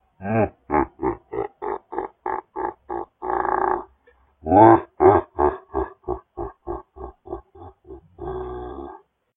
Demon Laugh 1
This sound is supposed to be a demon laughing crazily for some unknown reason.